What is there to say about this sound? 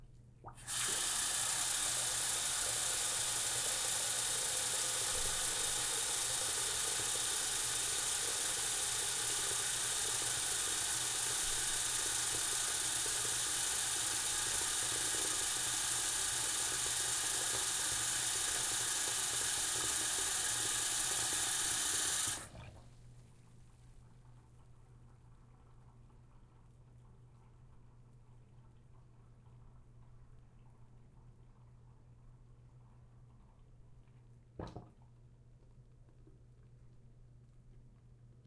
bathroom sink running water
running, sink, water